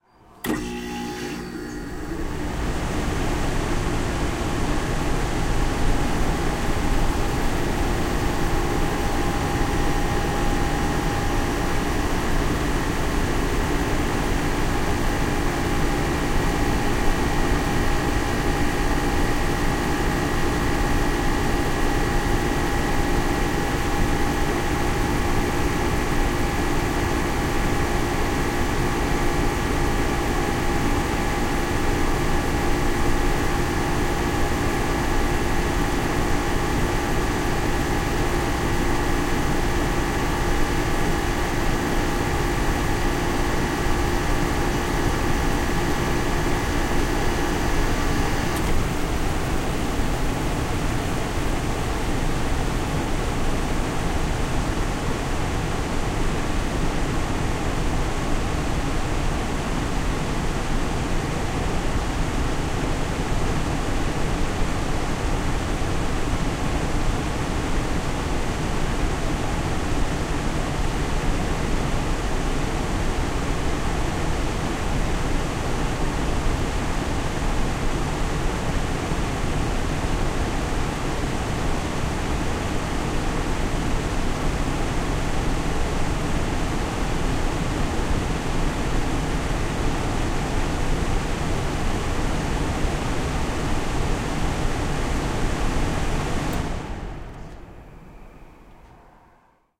AC cycle w comp
My window air-conditioner on the cool setting. Starts up with compressor. Then compressor shuts off, leaving only the fan, which turns off soon after.
Recorded on Yeti USB microphone on the stereo setting. Microphone was placed about 6 inches from the unit, right below the top vents where the air comes out. Some very low frequency rumble was attenuated slightly.
AC, Air-conditioner, Close-up, Compressor, off, On, Stereo